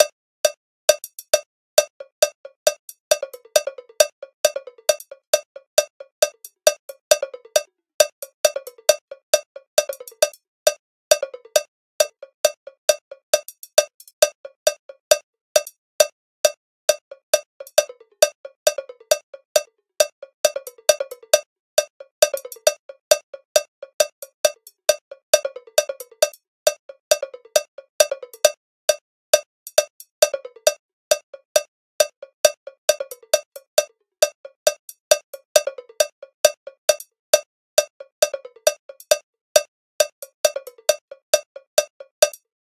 135-more cowbell
A bunch of Cowbells and 1 Cow Bell etc..
bell, cow, cowbell, loop